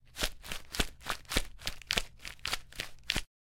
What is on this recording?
Crumpling/shaking paper
foley, shaking, crumpling, paper